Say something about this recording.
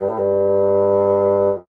Fgtt 43 G1 Tr-A1 a
wind, classical, fagott